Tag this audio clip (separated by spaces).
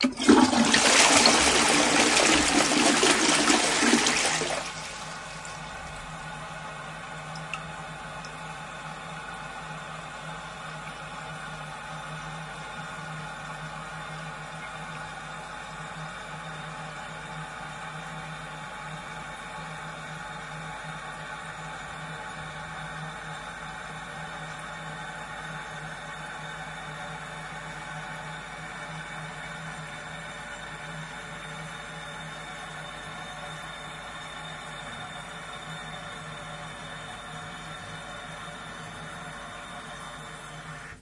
toilet water-closed WC